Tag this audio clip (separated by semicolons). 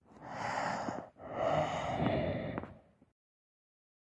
breath; male